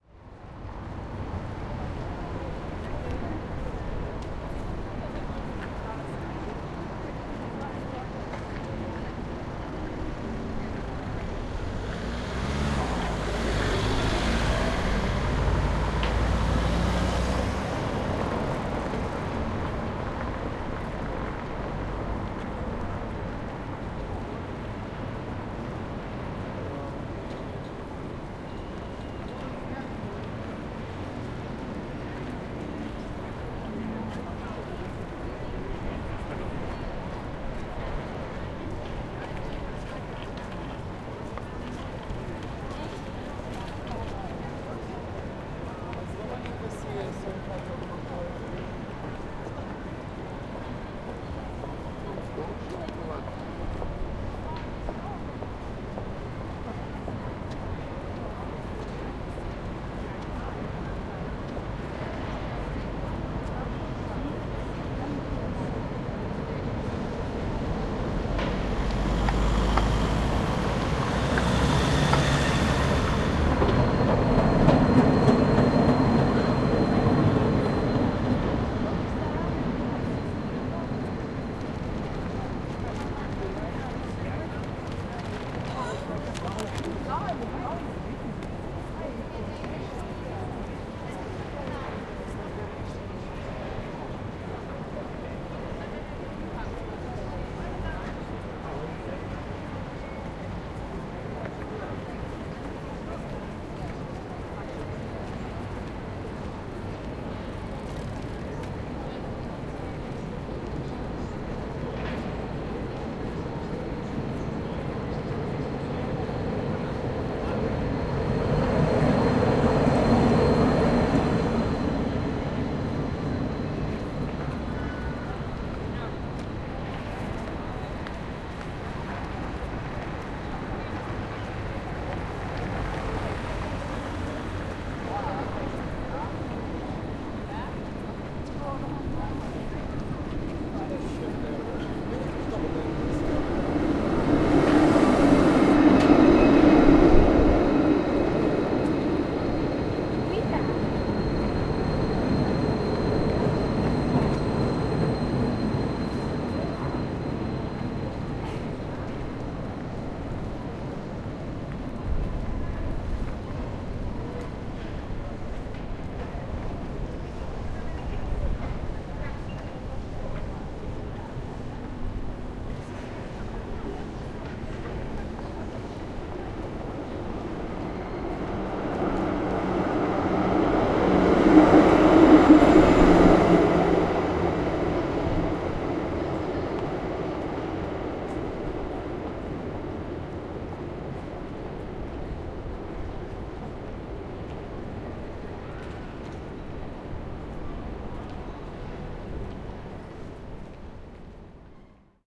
Katuhäly, kaupunki / Street in the city in the center of Helsinki in the 2000s, people, footsteps, talking, trams, a few cars
Helsinki, Aleksanterinkatu 2000-luvulla, rauhallinen. Jalankulkijoita, monikielistä puheensorinaa, askeleita. Epämääräistä kolinaa paikoin kauempaa. Pari autoa ohi, loppupuolella raitiovaunuja.
Äänitetty / Rec: Zoom H2, internal mic
Paikka/Place: Suomi / Finland / Helsinki
Aika/Date: 30.07.2008
Finnish-Broadcasting-Company, Tehosteet, Kaupunki, Yle, City, Finland, Suomi, Yleisradio